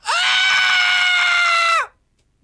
Male screaming (horror)